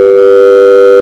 FISCHER ZOE-2016 2017 interphone
I didn’t expected anyone this afternoon, who can it be?
This is an interphone ring.
This audio was produced by merging two different tracks: I first created a tone of 392dB and a second one of 493.88dB in order to have the note G and B. I added the same effects on both of the tracks: first a small reverb effect and then a change of tempo of -61%.
Description selon Schaeffer :
Typologie : N’
Masse : Groupe tonique
Timbre harmonique : agressif, brillant, saturé, bruyant, crispant
Grain : Rugueux
Allure : Pas de vibrato
Dynamique : Abrupte et violente
Profil mélodique : Variation scalaire